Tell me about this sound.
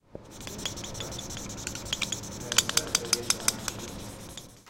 Sound produced by a marker into a plastic slate
This sound was recorded at the Campus of Poblenou of the Pompeu Fabra University, in the area of Tallers in the Classroom number 54.030. It was recorded between 14:00-14:20 with a Zoom H2 recorder. The sound consist in a distorted high frequency pitched with a high content of friction because of marking the blackboard.
blackboard, campus-upf, classroom, marker, painting, screen, UPF-CS12